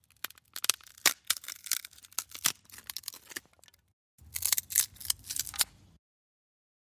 SFX wood crack small
SFX, wood, crack, destroy, burst
break, burst, crack, destroy, SFX, wood